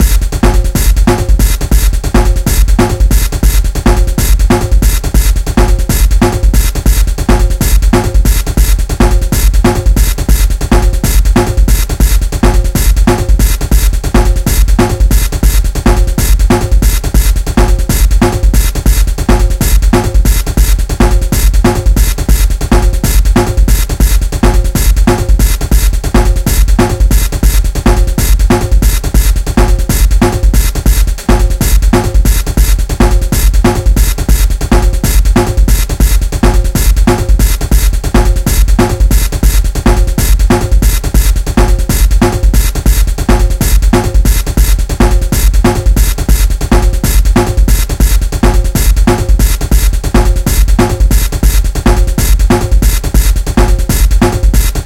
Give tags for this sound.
cymbals
quantized
breakbeat
rhythmic
hard-snare
percussion
rock
gritty
drumloop
drums
acoustic

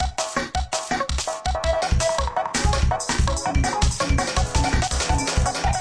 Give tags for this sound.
acid
breakbeat
drumloops
drums
electro
electronica
experimental
extreme
glitch
hardcore
idm
processed
rythms
sliced

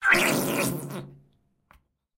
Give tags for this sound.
shampoo
tubes
loop
bubble
soap
h4
jelly
110
tube
empty
bpm

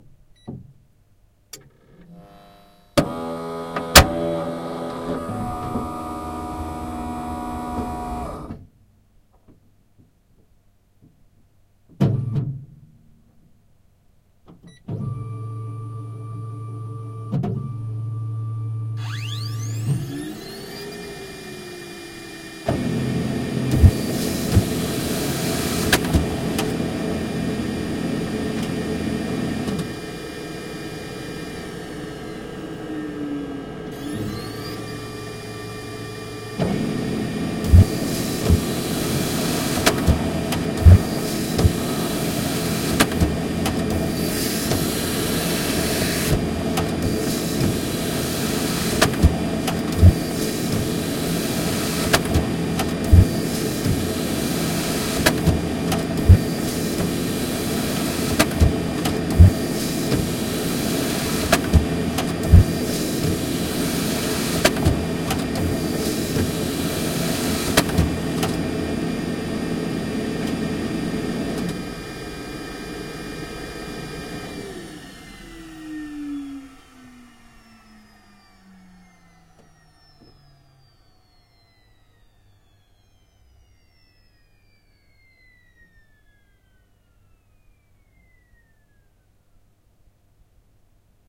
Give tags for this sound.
fotocopy
field-recording
copier
panasonic

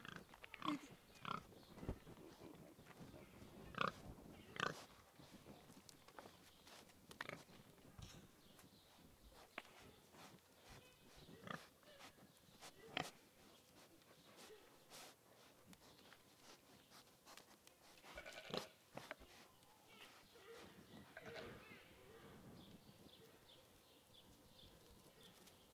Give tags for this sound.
Pigs
pig
grunting
squeal
Hogs
animal
Eating
close-up

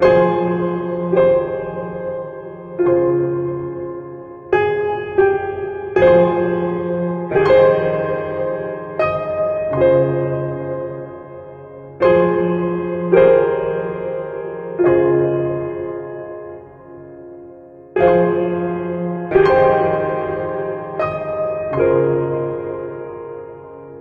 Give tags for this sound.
emotional
riff

